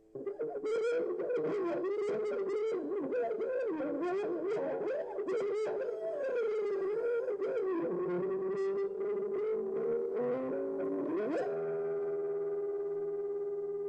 electric guitar strings rubbed with a coin to provide high pitch shaking distorted noise